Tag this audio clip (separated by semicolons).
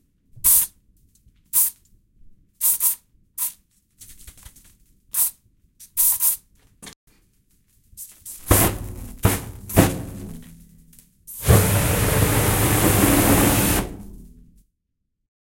burst; can; fire; reverb; spray